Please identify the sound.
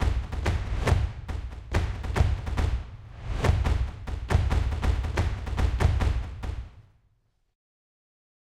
Royal Beat (At 140 bpm)
beat, rhythm, claps, march, lmms, loop, drum